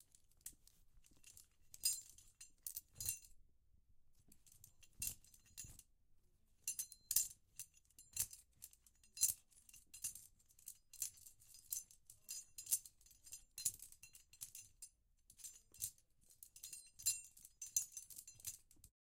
Climbing Gear
The sound of carabiners and a figure eight on a harness.
Carabiner, OWI, Rock-Climbing